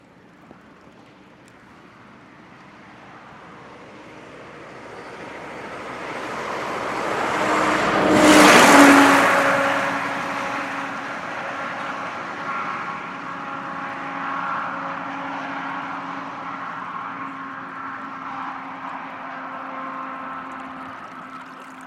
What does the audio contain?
FX - doppler camion al pasar 2
doppler, truck